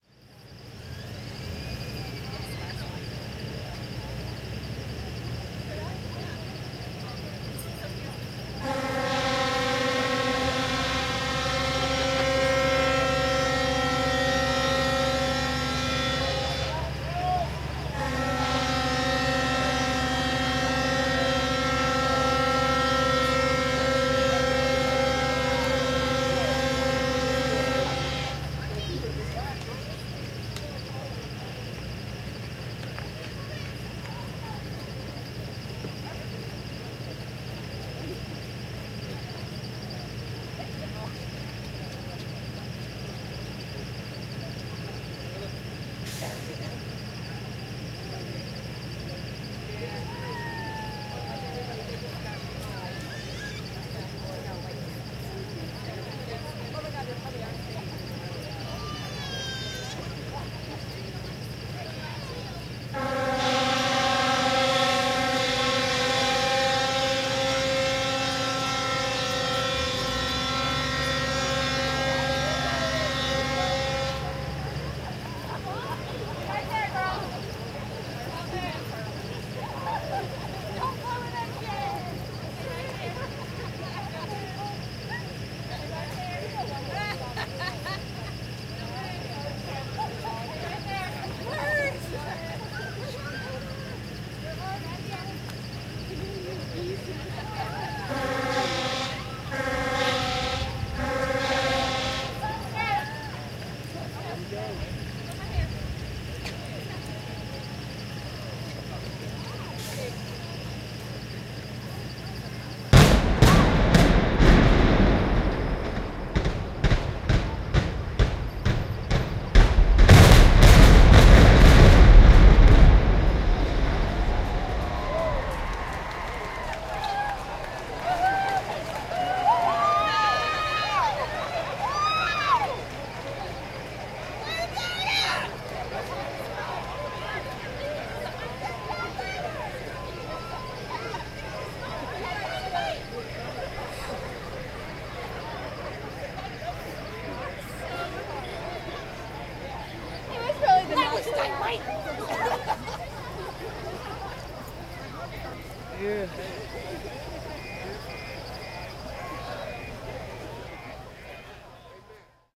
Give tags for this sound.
field-recording implosion demolition boom building destruction explosion

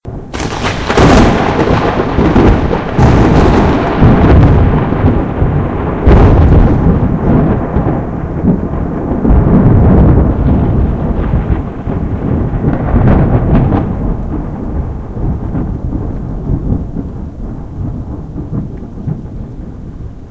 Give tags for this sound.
film game thunder video

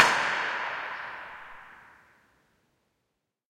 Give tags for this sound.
drum field-recording hit industrial metal metallic percussion percussive staub

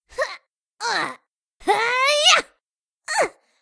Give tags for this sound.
clear fight american fighting grunt video game talk girl female speak gaming

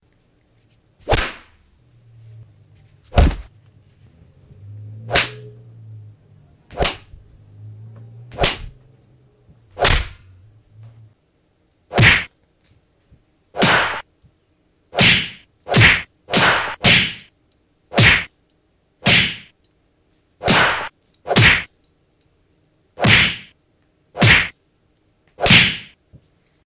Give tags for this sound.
belt bully cliche fight indy-mogul indymogul kick pain punch remix slap smack sock stereotypical whip

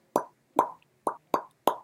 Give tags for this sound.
popping up pop

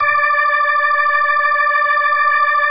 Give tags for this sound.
sound organ rock

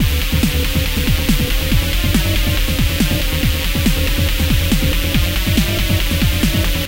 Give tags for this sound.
vicces video

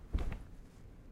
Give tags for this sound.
wall impact body hit

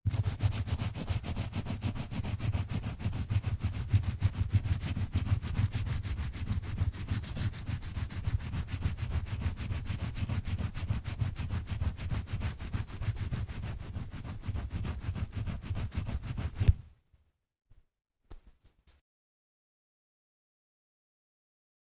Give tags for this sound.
cleaning scrubbing scrub

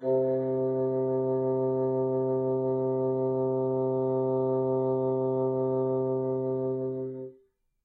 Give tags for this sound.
woodwinds vsco-2 single-note bassoon midi-velocity-31 midi-note-48 vibrato-sustain c3 multisample

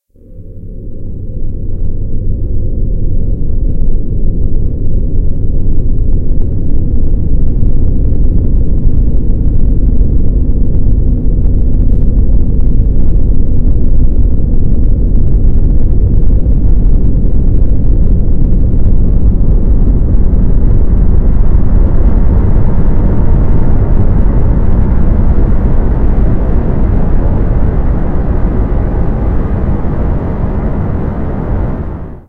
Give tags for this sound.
audacity bass dark deep depths harsh noise processed rumble space

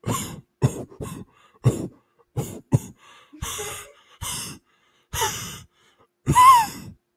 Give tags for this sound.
Hot Wheeze Wind Heat-Attack Breathing Air Winded Man-Wheezing Breathe Breath

